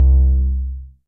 Straight C Saw from the all analog Akai Timbre Wolf Synthesizer